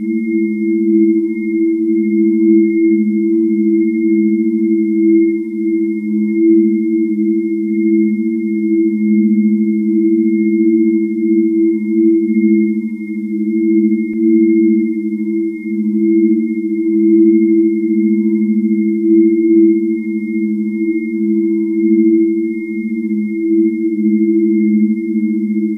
Pitched-up version of my randomly generated Subtle Horror Ambience for creepy movies and indie horror games. This version sounds creepier and more alien.
Check also the original and longer low-pitch version of this ambient loop, included in my Alien Algorithm Pack, which sounds more subtle, darker and less noisy than this one.